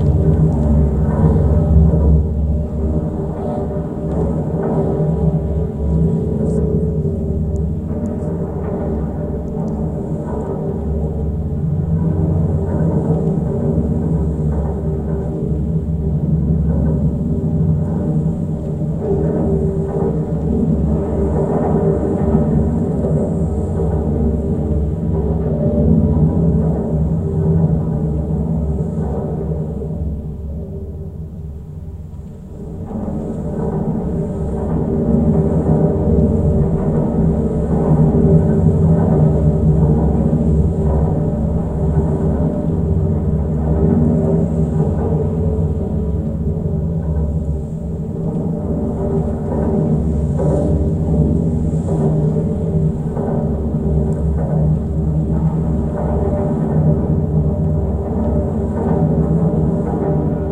GGB A0207 suspender SE01SW
Contact mic recording of the Golden Gate Bridge in San Francisco, CA, USA at the southeast approach, suspender #1. Recorded October 18, 2009 using a Sony PCM-D50 recorder with Schertler DYN-E-SET wired mic.
contact-mic, Golden-Gate-Bridge, DYN-E-SET, contact-microphone, Schertler, steel, wikiGong